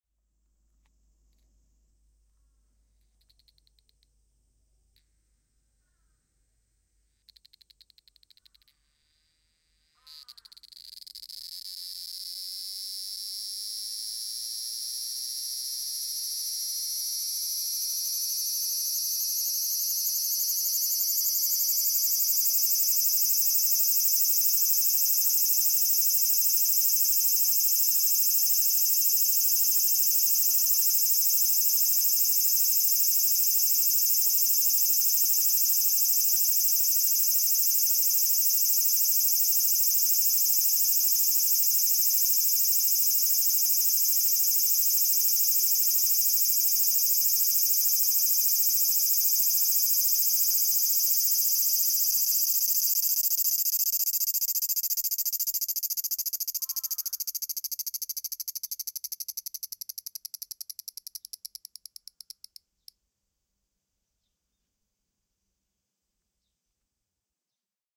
A single cicada lands on the window screen in Nagoya, Japan, 24.07.2013. Recorded with a Sony PCM-M10 placed at 10 cm of the cicada, you can hear all of cicada's 'singing' process.
Insect, Summer, Close-up, Cicada